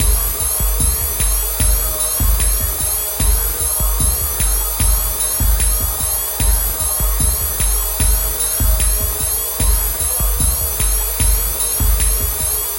075 Windy Shed G#m
Abstract panning noises with drum and vocal elements. Meant to be placed in the background. Might be worth a closer listening.
075bpm, ambient, groovy, panning, percussion-loop, rhythm, vocal